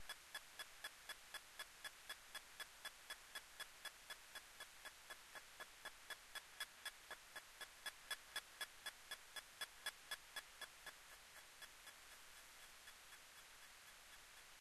Electromagnetic field recording of a temperature controller using a homemade Elektrosluch and a Yulass portable audio recorder.